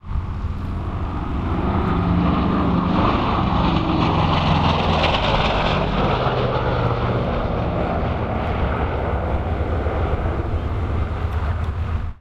Super Constellation Flypast
Lockheed Super Constellation low pass, R-L in clean configuration. Heavy multiple radial engine sound from four engines. There is a little wind noise in background.